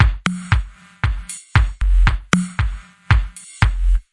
house beat 116bpm with-03
reverb short house beat 116bpm